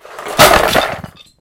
box of stuff falls
Sort of a clattering or crash sound when the box hits the floor, like something smashing.
It was made my me placing my recorder close to where I would drop the box on the floor. the box was actually full of lego bricks.
falling, box-falls, breaking, crash, box, clatter